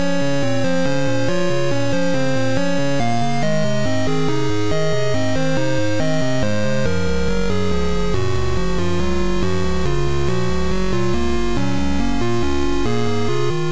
8-bit-harmony-140
An 8bit harmony I threw together in Fl Studio
gameboy, vintage, chipsound, electronic, house, 8-bit, chip-tune, chiptune, lo-fi, chip, electro, harmony